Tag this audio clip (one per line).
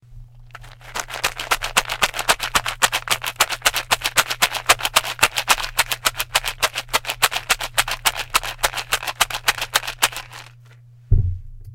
Mints
rattling
shaking